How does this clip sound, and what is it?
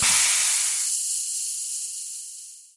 hiss air blast